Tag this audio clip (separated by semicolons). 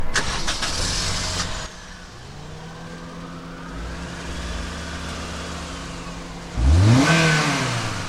car; drive; engine; motor; starting